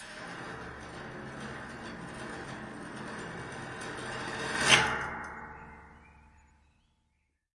Andauernde Spannung
My "Falltuer Samplepack" deals with the mysterious sounds i recorded from the door to the loft of our appartement :O
The Recordings are made with a Tascam DR-05 in Stereo. I added a low- and hipass and some fadeouts to make the sounds more enjoyable but apart from that it's raw
dynamic, metall, dr-05, tascam, atmospheric, stereo, dramatic, ghosts, spooky, mysterious, phantom